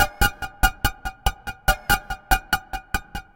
FunkySynth Pizz-sequence-stabs 143bpm
Sinister synth sequence, consist in stabs with short decay producing a pizzicato-like sound.
2 bar, 143 bpm
The sound is part of pack containing the most funky patches stored during a sessions with the new virtual synthesizer FM8 from Native Instruments.
abstract, alert, electro, funk, funky, lead, loop, movie, percussive, rhythym, riff, score, sequence, soudtrack, soundesign, suspence, synth, synthesizer, talkbox, tense